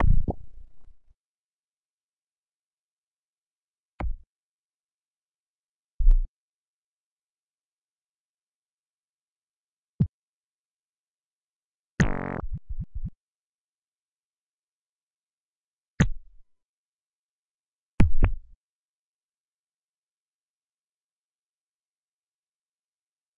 some percussive sounds (kicks, hihat, snare, shaker and blips) derived from a single hit on an empty tin can.
derived, percussion